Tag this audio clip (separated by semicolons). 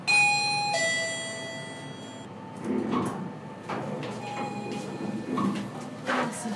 puerta; ASENSOR; timbre